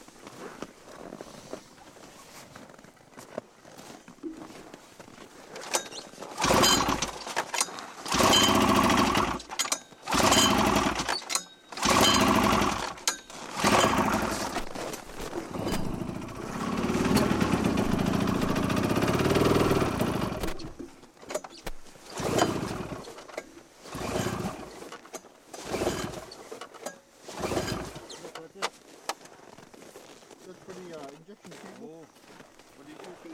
snowmobile false starts pulling cord3 engine almost kinda starts
false, pull